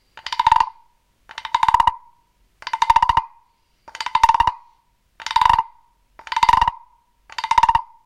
bangkok frog
sound of a wooden frog that makes a kind of frog-like noises when you
rub it with a stick. (mono recording)You can buy your own in the
streets or at the markets in Bangkok.
frog, toy, wood